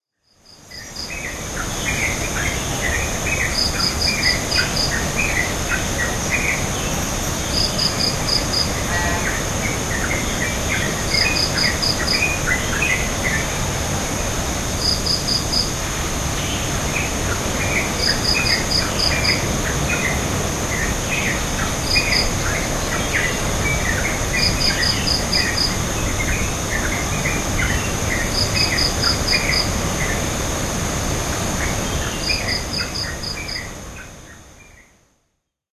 A recording made at dawn in Canggu, south Bali.